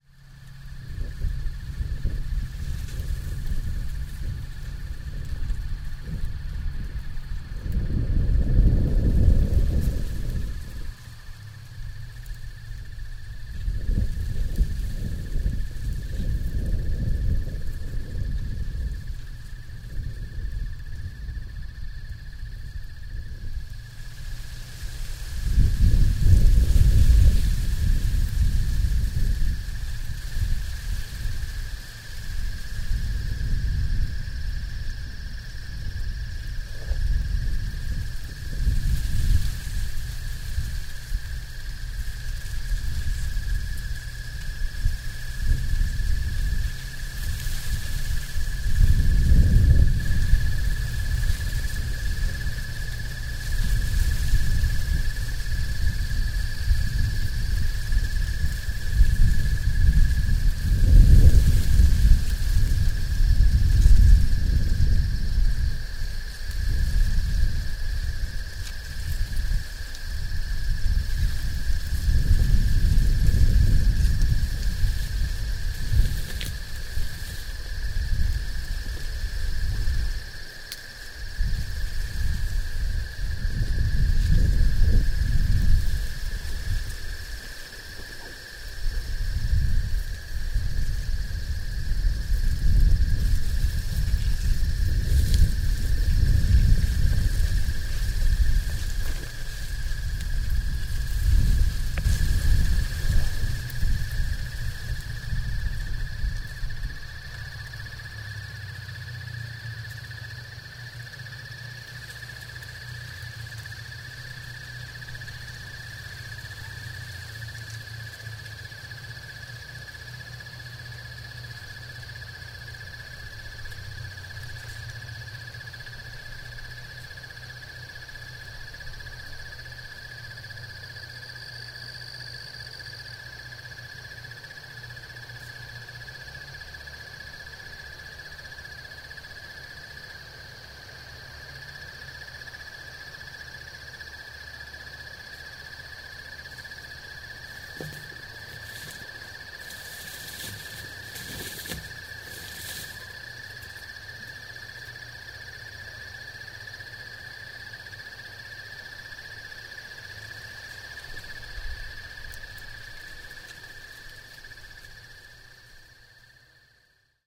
Autumn is a time for slowing down and allowing yourself time for reflection and contemplation. The accomplishments and mistakes from the previous year. Especially if you make it a habit of getting out all year long and exploring nature, Autumn can be a much-needed change in sensory soundscape. .after a busy Spring listening to the many different Warblers which come to visit and a busy Summer immersed in the BUSY sounds of life----both human and natural----Autumn's subtle, quiet muted soundscape can be almost like a re-birth for the ears and the soul. The insects, which had taken over center stage since August, are still singing---though now with a quieter volume and a more even sleep and though-inducing tempo........Brisk winds from the north can be heard more clearly now----swishing, rustling the weedy, tan edges of fields..... hinting at the bite of much colder masses of Canadian air waiting on deck for their turn in the months of November, December and January...